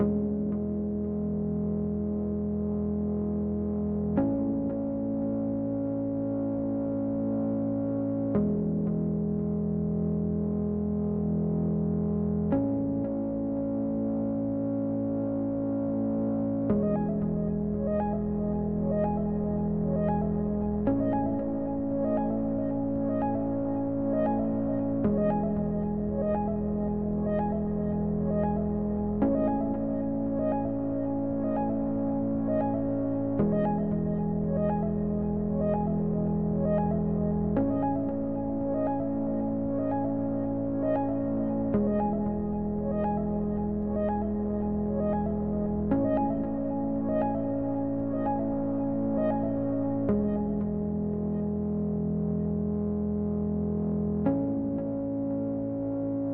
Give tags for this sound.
ambiance ambient atmosphere dance electronic flutes loop loopmusic music original pad sound synth track trance

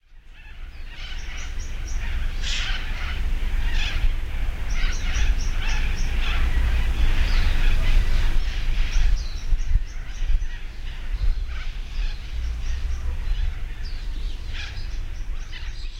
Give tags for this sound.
australia birds corellas deniliquin flock nsw

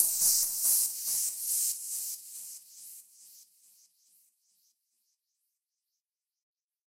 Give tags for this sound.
sweep sidechain fx shot

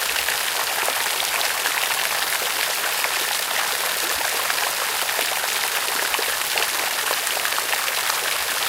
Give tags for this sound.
drip dripping droplet drops loop nature pattering rain rainstorm storm water weather

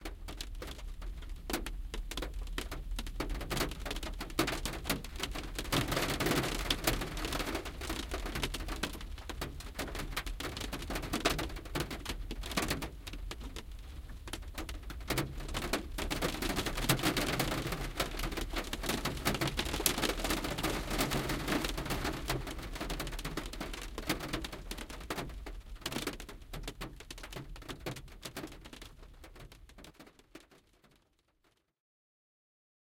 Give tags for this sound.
big-drops car-roof field-recording rain

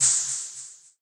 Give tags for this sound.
ambient button hiss hissy hi-tech press short switch synthetic

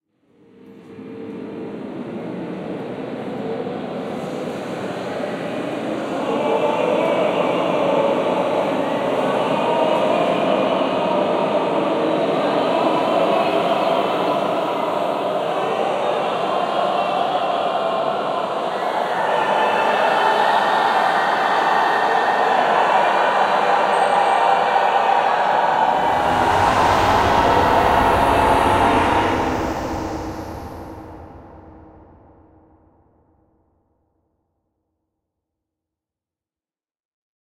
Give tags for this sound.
human soundeffect chorus effect sci-fi

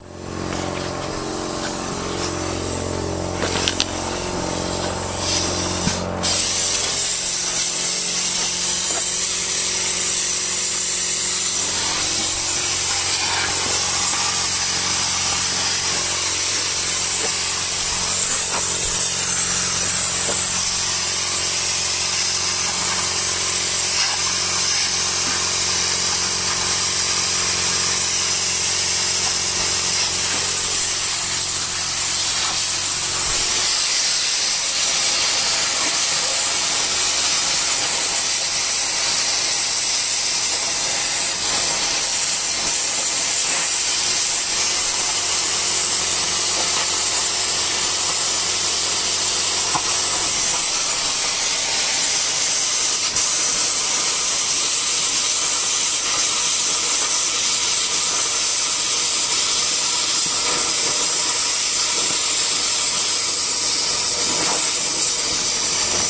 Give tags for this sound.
noise machine cutting plasma system dragnoise

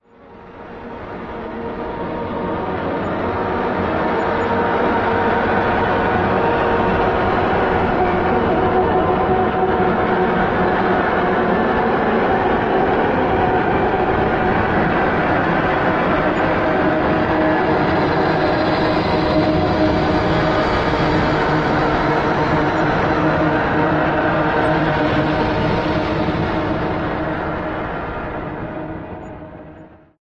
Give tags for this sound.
abstract experimental glitch noise processed soundeffect sound-effects spook strange

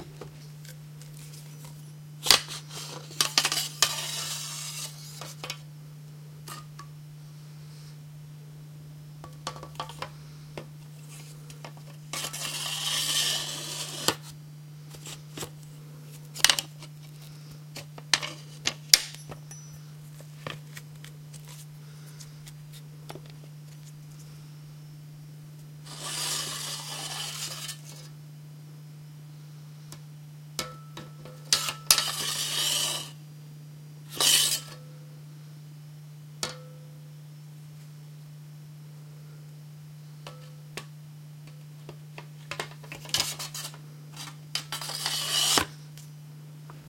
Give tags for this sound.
drag weapon scrape katana sword

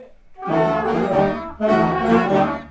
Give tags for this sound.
horns band

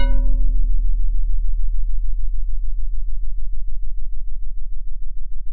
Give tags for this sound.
mandelbrot noise synthesis additive harmonics chaos-theory

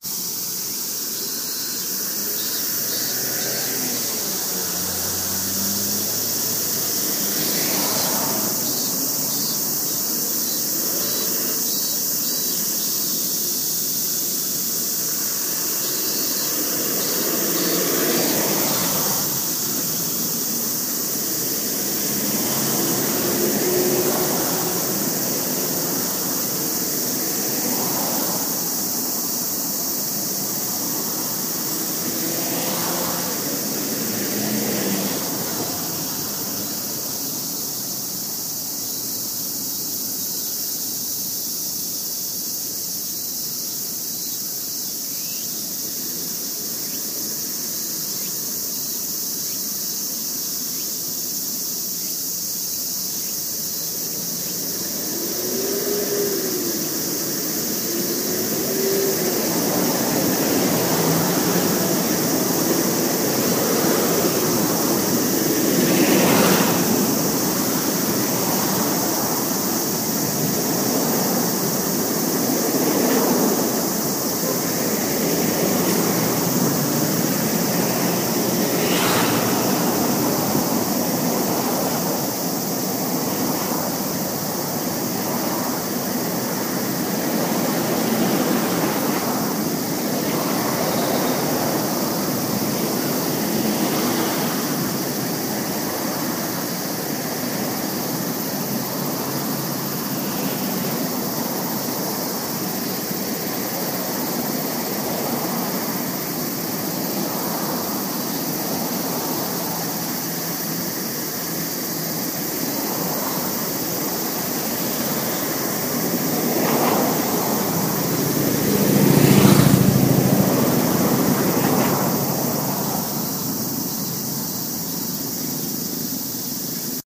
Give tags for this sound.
ambience cars cicadas crossroad field-recording japan motorcycles noisy summer tracks traffic

